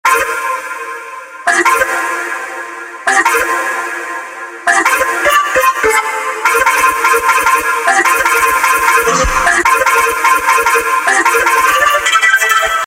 I Have my space hat on.i hope u have yours on too.Also it was recorded at 150Bpm.
space, voice